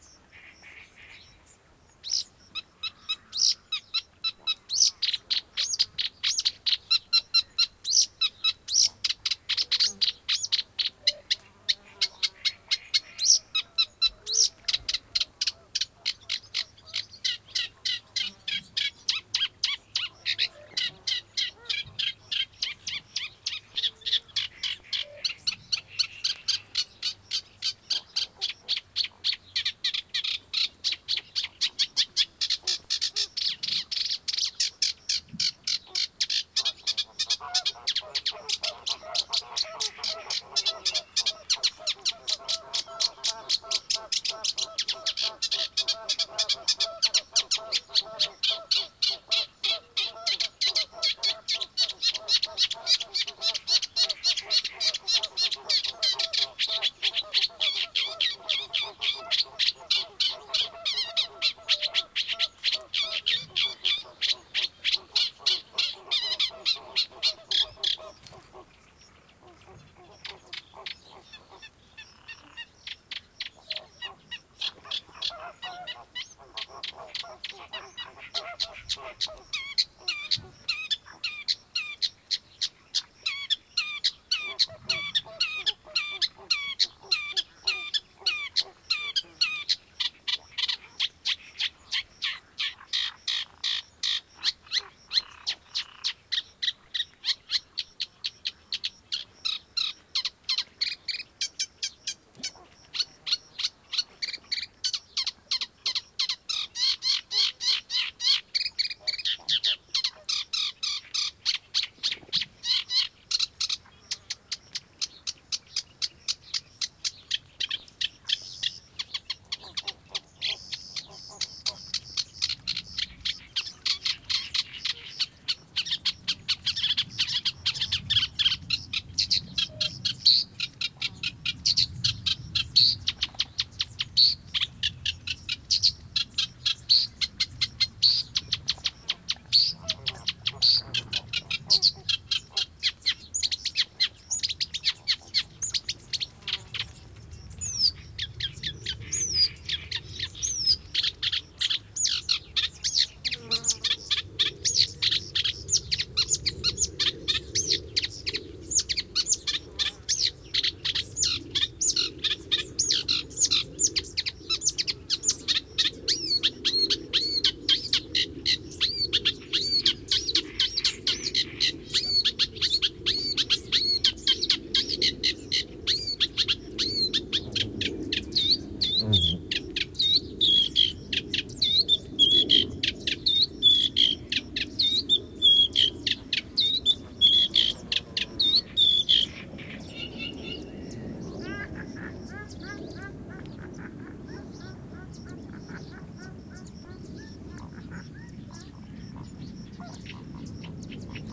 Song of a Reed Warbler (thanks Reinsamba), crystal clear and very close. The bird was two m away inside vegetation but I couldn't see him. In background, Greater Flamingo, insects, a very distant airplane. Recorded near Centro de Visitantes Jose Antonio Valverde (Donana, S Spain) using Sennheiser MKH60 + MKH30 into Shure FP24 and Edirol R09 recorder, decoded to mid/side stereo with Voxengo free VST plugin
birds, ambiance, donana, south-spain, nature, acrocephalus, field-recording, marsh